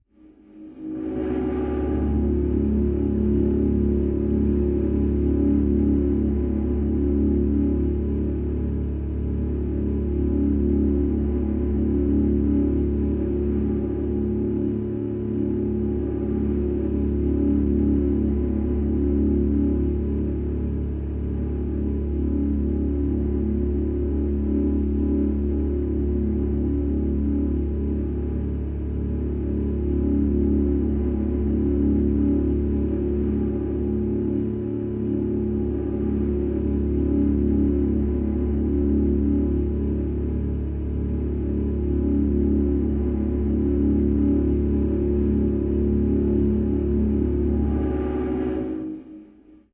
Drone BowedCybmal
A recording of a bowed cymbal time-stretched and smoothly looped. Some inner movement but overall a static sound.
Note: you may hear squeaking sounds or other artifacts in the compressed online preview. The file you download will not have these issues.
thriller, suspense, horror, string, ominous, deep, low, bowed, scary, drone, dark